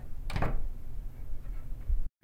Lightswitch OFF
Switching off of a ceiling light
off, click